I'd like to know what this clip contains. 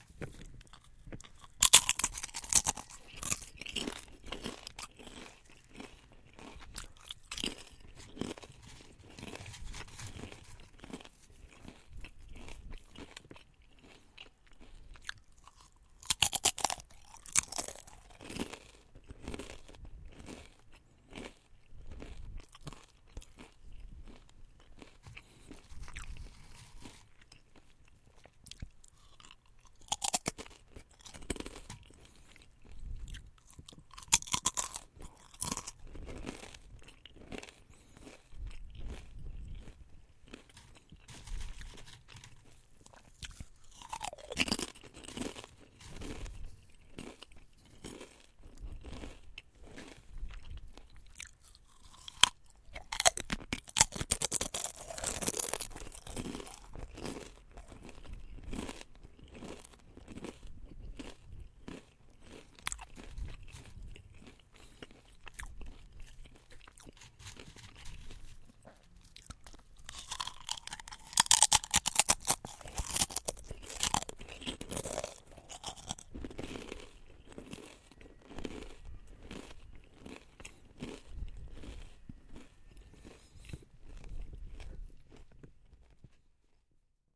Eating Sound - ASMR crunchy sound
crunching, eating